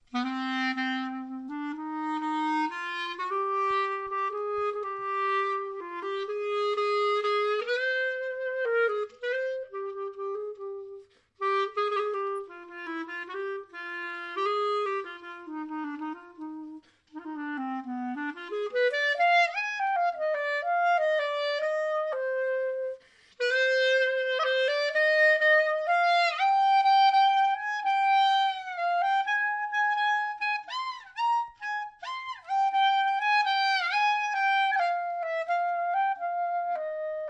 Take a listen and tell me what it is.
A musician is playing the clarinet in Conservatori Escola Josep Maria Ruera.
Un music tocant el clarinet en el Conservatori Escola Josep Maria Ruera.